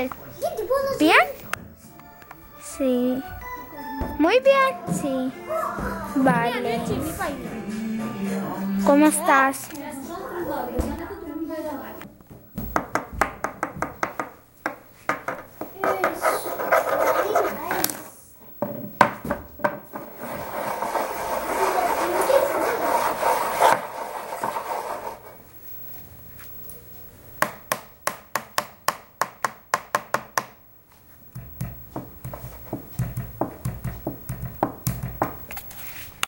Sonic Postcard AMSP Aisha Edu
AusiasMarch, Barcelona, CityRings, SonicPostcard, Spain